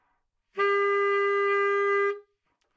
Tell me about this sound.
Part of the Good-sounds dataset of monophonic instrumental sounds.
instrument::sax_baritone
note::A
octave::2
midi note::33
good-sounds-id::5524
Intentionally played as an example of bad-richness